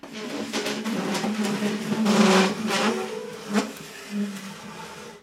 chaise glisse3
dragging a wood chair on a tiled kitchen floor
chair, furniture, tiled, wood, floor, squeaky, dragging